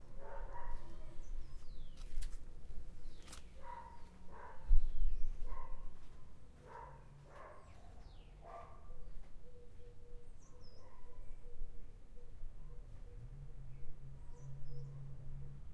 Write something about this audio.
dogs and birds 01 close steps
Ambient sounds recorded in a village in portugal, August 2016 using a Zoom H1 recorder fitted with standard windshield.
Plenty of birds and also distant dogs barking.